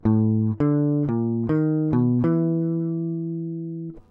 guitar abstract melody2
jazz guitar recorded